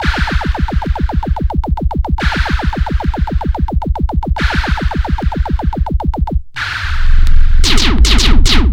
The 8 Bit Gamer collection is a fun chip tune like collection of computer generated sound organized into loops